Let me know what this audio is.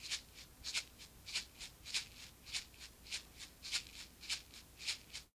Tape Shaker 8
Lo-fi tape samples at your disposal.
collab-2, tape, vintage, lo-fi, lofi, shaker, mojomills, Jordan-Mills